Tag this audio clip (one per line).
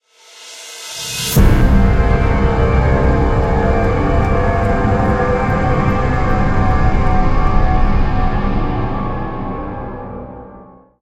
stab
ominous
dark
sci-fi
sfx
electronic
soundeffects
action
synth